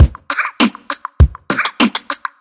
Small loop made with some 'mouth' sounds :D